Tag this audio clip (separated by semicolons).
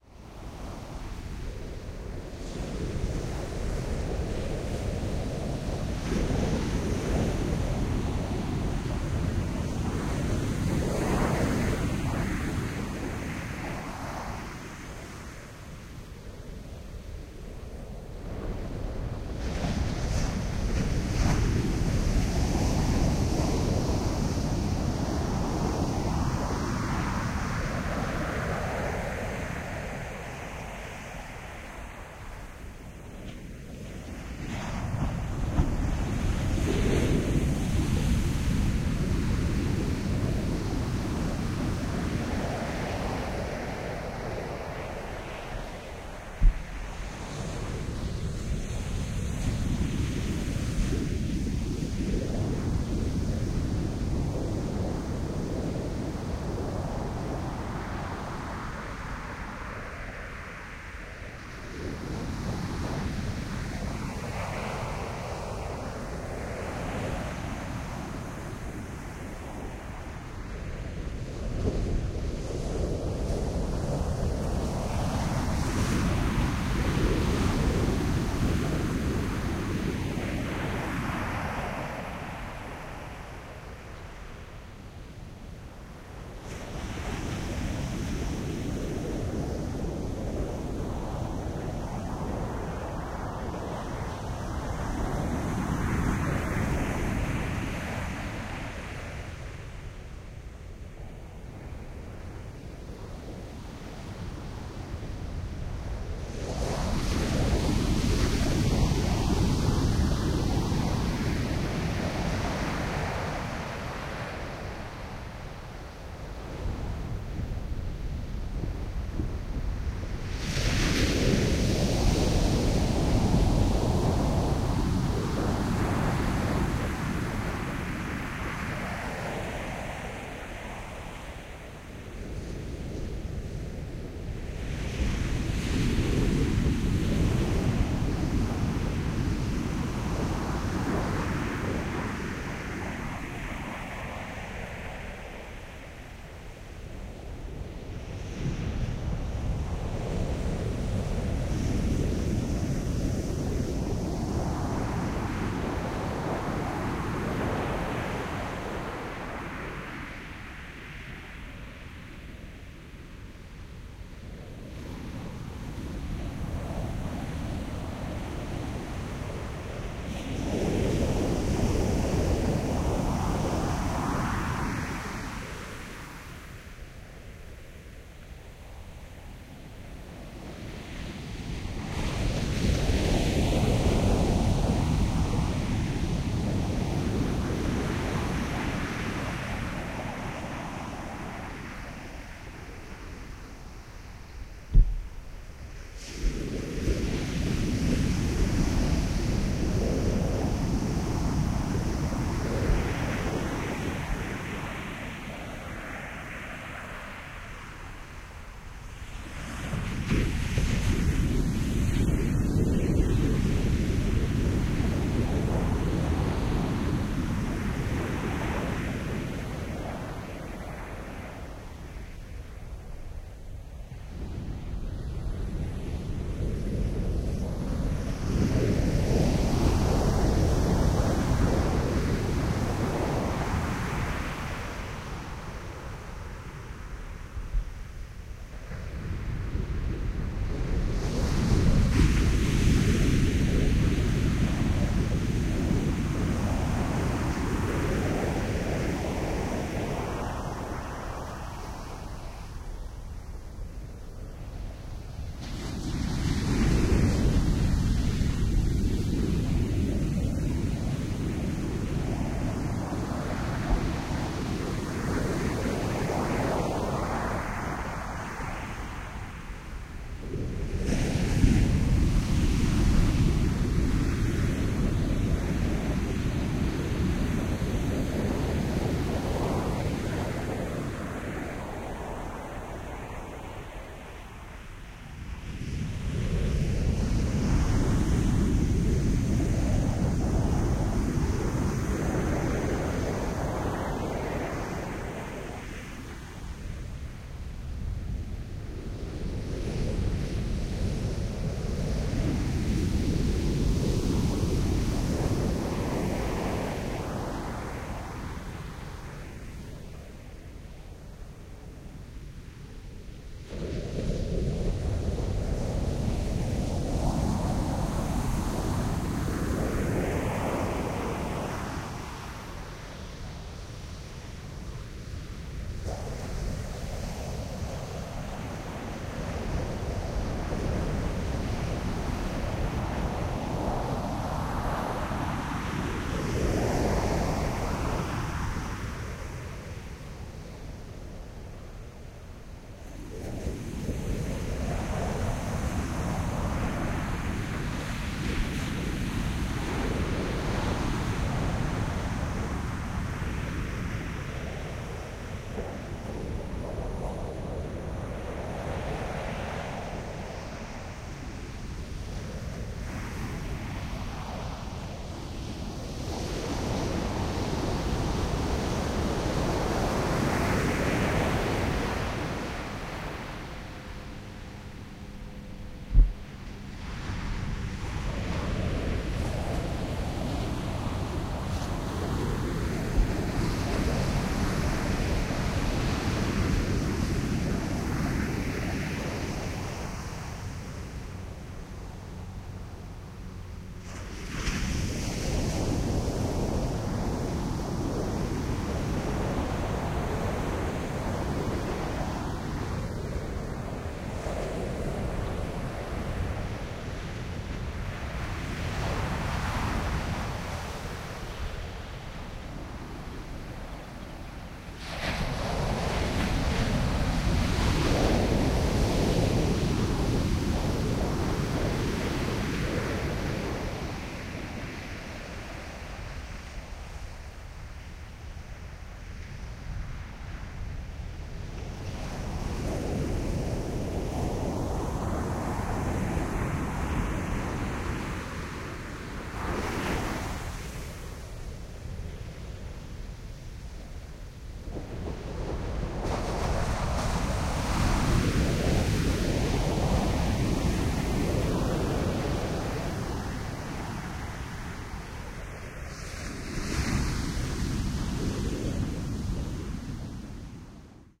ocean; waves; field-recording; water